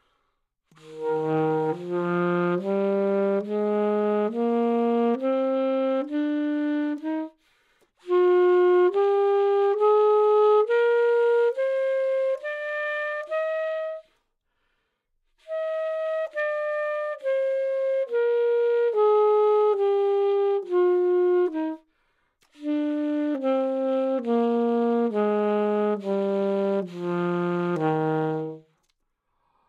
Sax Alto - D# Major
Part of the Good-sounds dataset of monophonic instrumental sounds.
instrument::sax_alto
note::D#
good-sounds-id::6797
mode::major
alto, DsharpMajor, good-sounds, neumann-U87, sax, scale